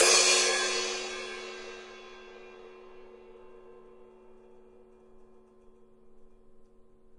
Crash from my friends neglected kit.
percussion, live, Drums